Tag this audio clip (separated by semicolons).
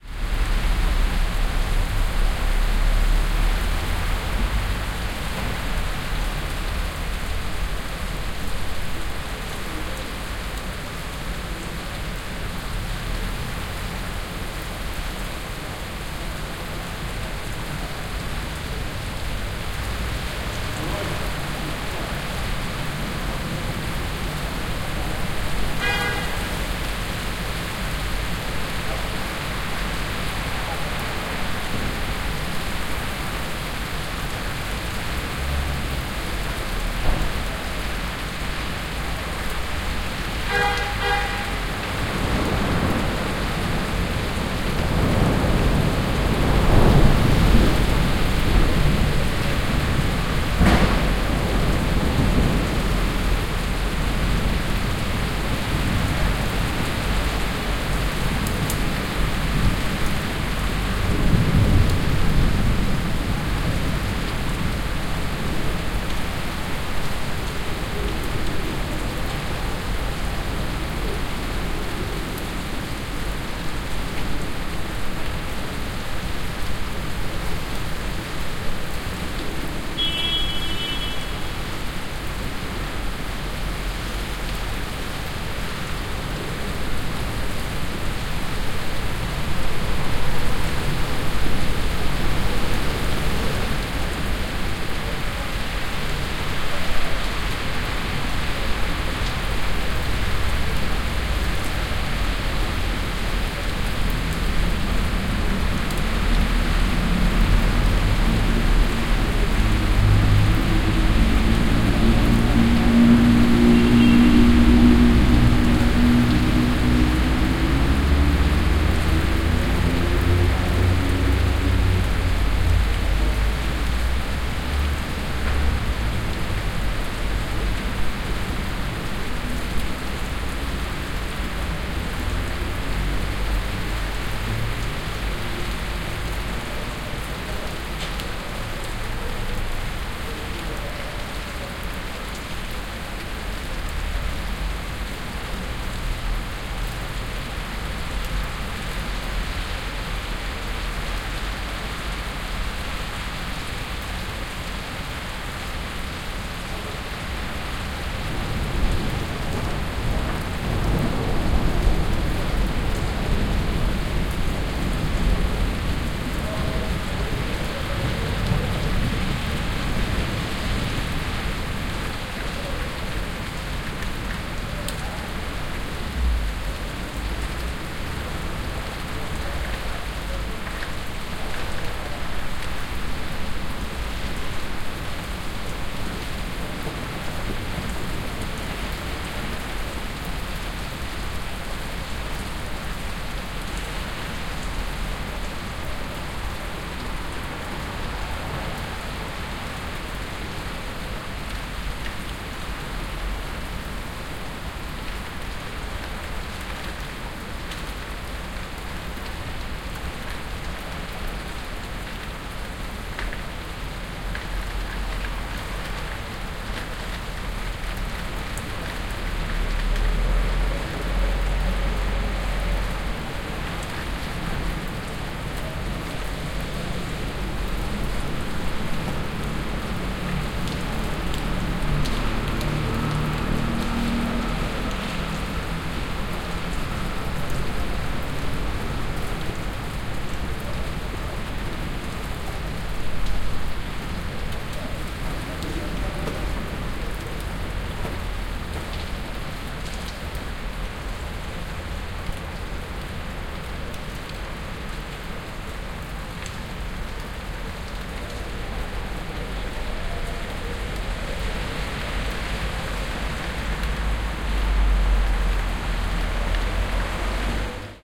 binaural; city; rain; rain-storm; street; thunder